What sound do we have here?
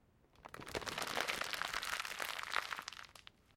LIGER Romain 2018 2019 Crunches
To make this sound, I record myself twisting a piece of plastic. In Audacity, I normalize it and slow it down. Then, I apply a low pass filter, just a bit of echo and some fade in/out.
Selon la typologie de schaeffer, ce son est un son itération variée (V’’)
Morphologie :
- Masse : son cannelé
- Timbre harmonique : craquelant
- Grain : rugueux
- Dynamique : l’attaque du son est graduelle
- Profil mélodique : variation scalaire
- Profil de masse : son filtré
record, noisyfootstep, Crunches, plastic, weird, noise